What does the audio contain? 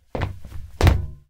Single jump on metal sample.